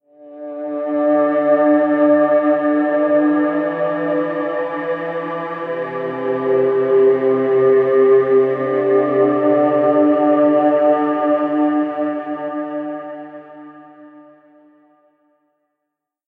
a little and very simple music that represents a event, achieve or discovery
achieve, discovery